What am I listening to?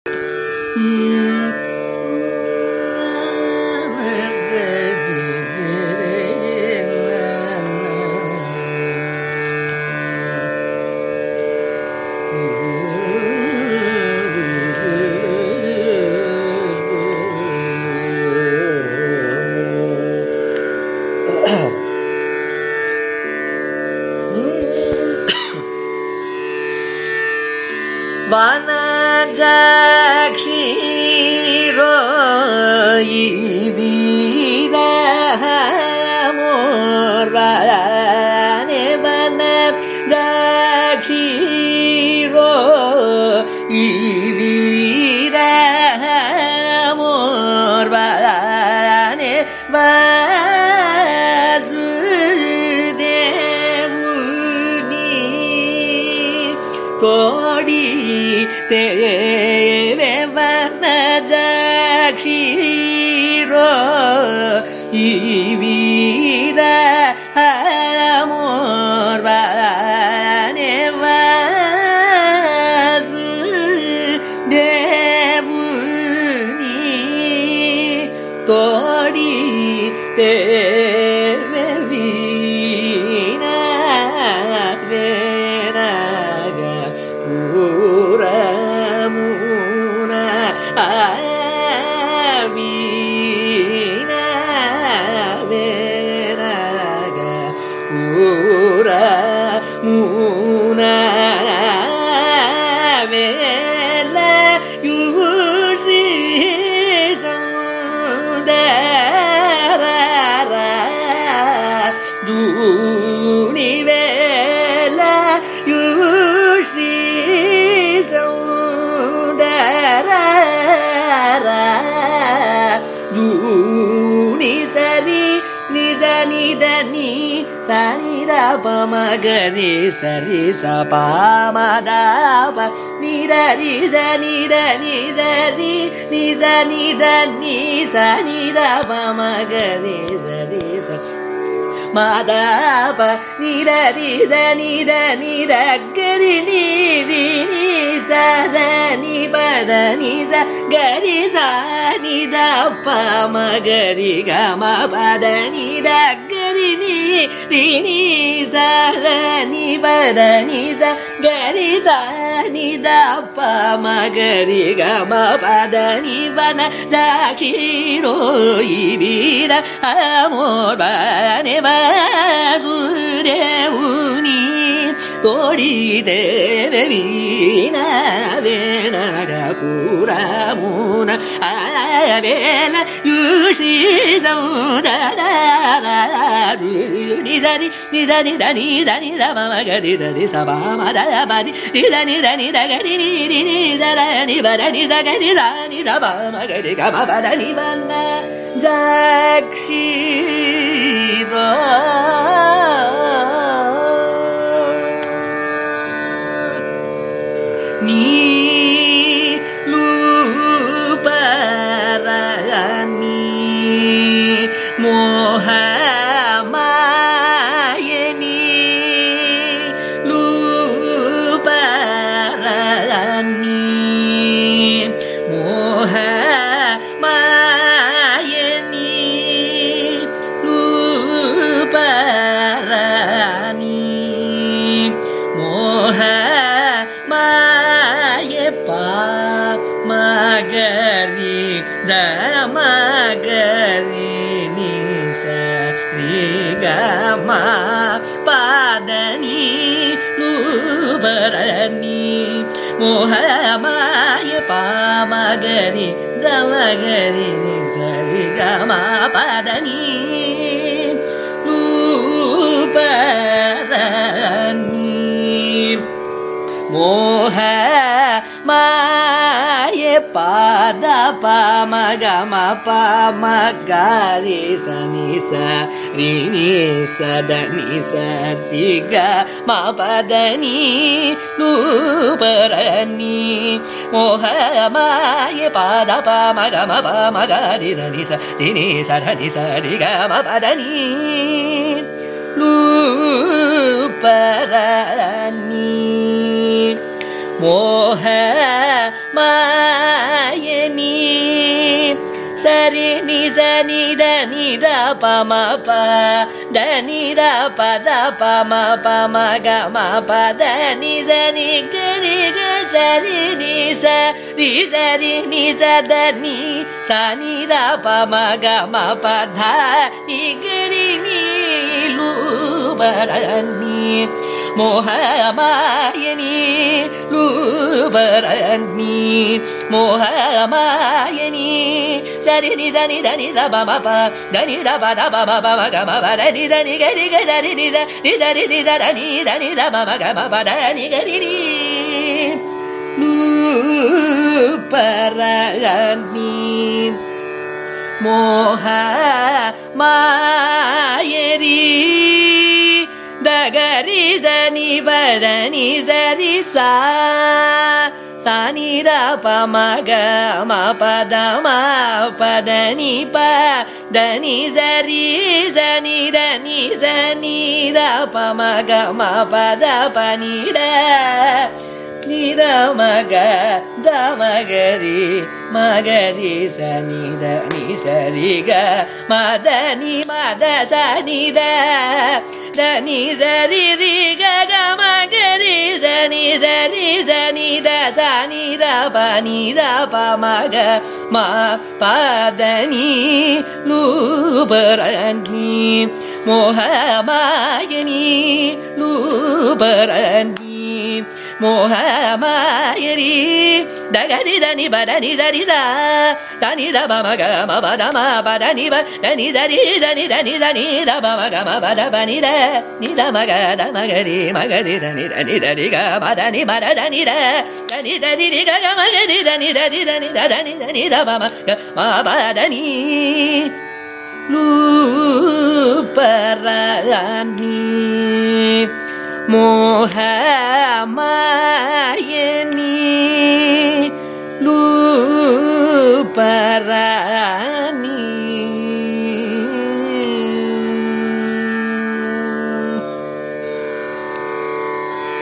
Carnatic varnam by Ramakrishnamurthy in Kalyani raaga
Varnam is a compositional form of Carnatic music, rich in melodic nuances. This is a recording of a varnam, titled Vanajakshiro, composed by Ramnad Srinivasa Iyengar in Kalyani raaga, set to Adi taala. It is sung by Ramakrishnamurthy, a young Carnatic vocalist from Chennai, India.
carnatic, carnatic-varnam-dataset, compmusic, iit-madras, music, varnam